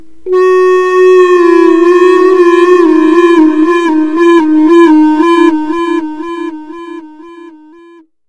Various flute-like sounds made by putting a mic into a tin can, and moving the speakers around it to get different notes. Ambient, good for meditation music and chill.